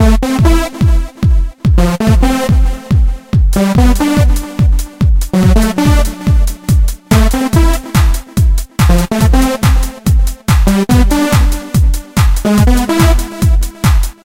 Trance-synth-loop-2
trance, synth, loop